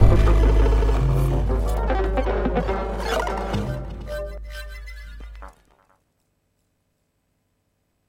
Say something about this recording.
FCB NyolcnutSFX 03
abstract, detritus, effect, fx, long, oneshot, sfx, sound-design, sounddesign, soundeffect